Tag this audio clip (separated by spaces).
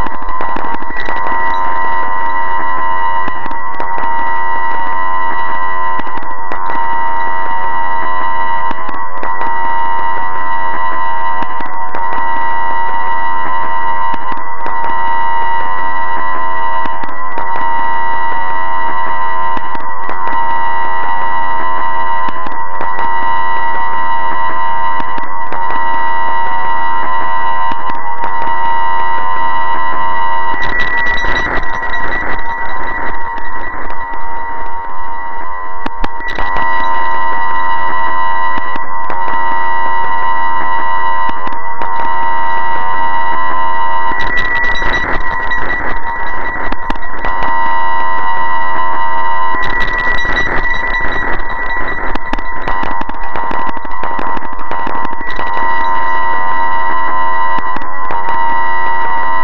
electronic fubar noise processed